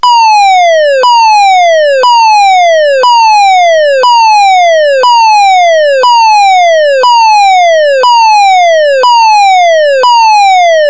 Firealarm (Feueralarm)
A simple firealarm, created with Audacity.
Ein simpler Feueralarm, erstellt mit Audacity.
feuer, alarm, audacity, sirene, fire